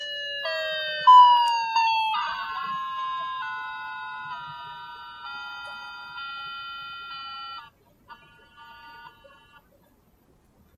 when the toys go winding down
My daughter's song-box was running low on batteries. This is the last sound I could squeeze out of it.
sad, toy, music, down